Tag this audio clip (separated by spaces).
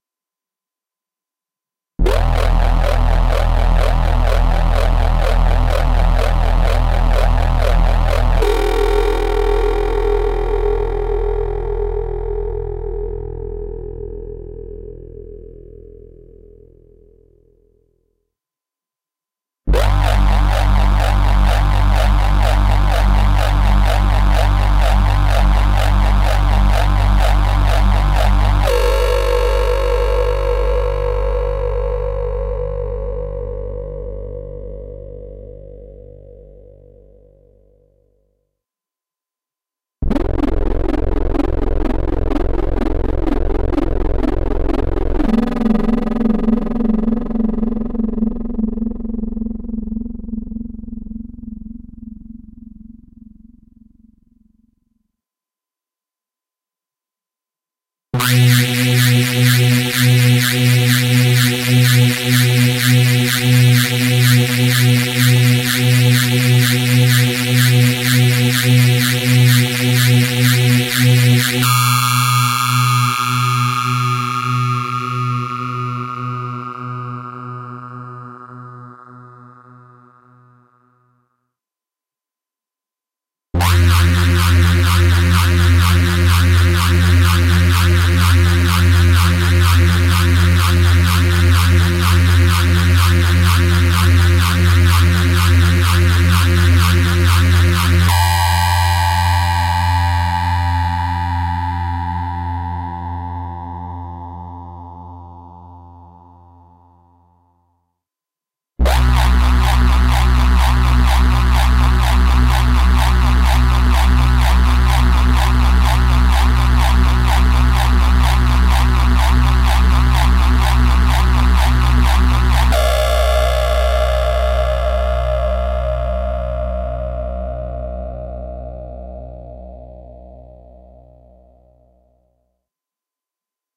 sci-fi electric drone annoying computer modulation experiment laser digital damage sweep sound-design